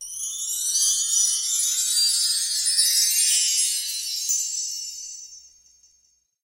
chimes 6sec gliss up
Rising glissando on LP double-row chime tree. Recorded in my closet on Yamaha AW16-G using a cheap Shure mic.